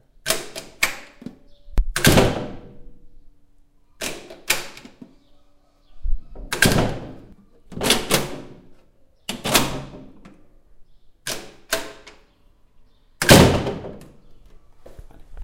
Puerta abrir y cerrar metalica